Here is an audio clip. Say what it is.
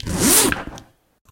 0004 MZipper Processed
Recordings of the high quality zipper hardware on Alexander Wang luxury handbag called the Rocco. Zipper recording manipulated in post production
Leather, Alexander-Wang, hardware, Handbag